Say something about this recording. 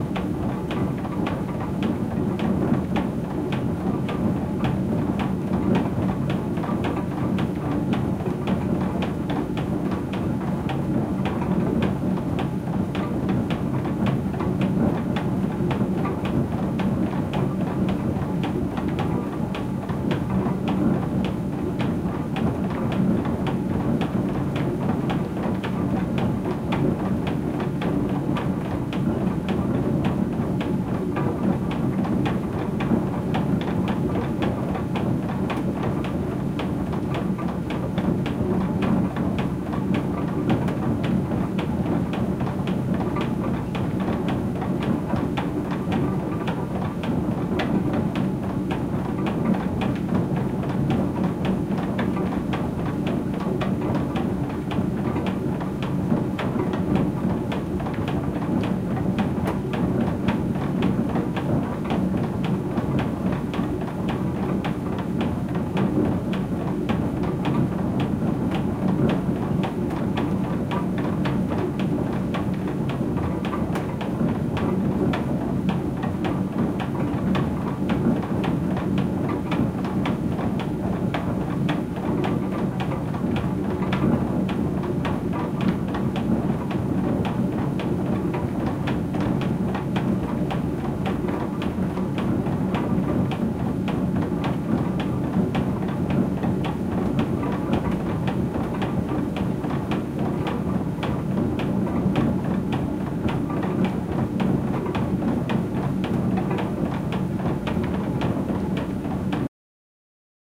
Water milll - millstone and gears
These sounds come from a water mill in Golspie, Scotland. It's been built in 1863 and is still in use!
Here you can hear the millstone grinding in the middle floor of the mill.
historic, machinery, mechanical, water-mill